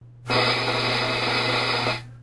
drum roll
When I came to the strange decision to try recording my poems as songs I looked for ambience around the house. Just a quick drumming with sticks
drum, percussion, rhythm